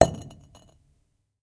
stone falls / beaten on stone